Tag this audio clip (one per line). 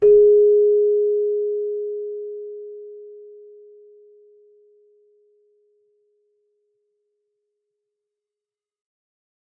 chimes
celesta
keyboard
bell